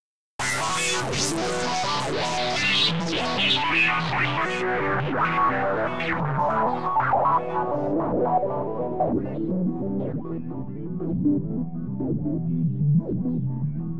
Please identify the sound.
treated synthesiser lead